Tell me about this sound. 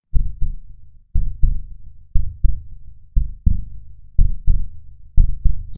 Heart trouble
By tapping the back of my lap top, I made an unusual heartbeat. Removed hissing, added a deeper pitch and deleted certain beats for a somewhat rhythmic beat.
beat, heart, thumping